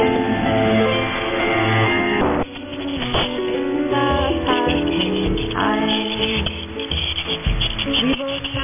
Here are some more loops I made from radio. I recorded them with the recording tool of Twente university's online radio receiver:
alienxxx, continuum-7, loop, music, radio, shortwave, twente-university